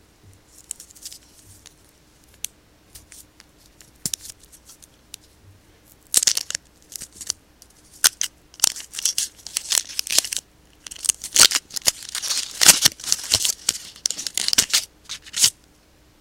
Recorded CandyWrapper03
Opening up some candy from the wrapper.